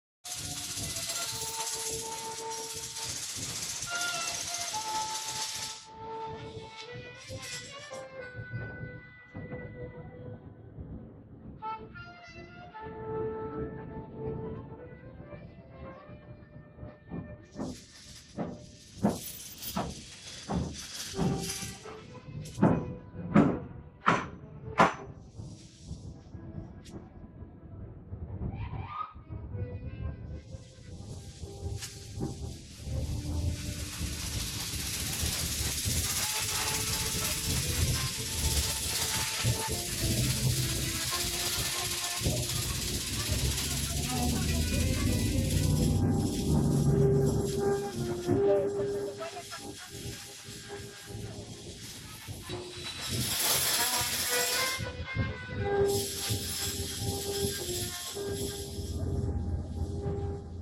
maquinas vs gavilan o paloma
la maquina vs el hombre
machine, music, abstract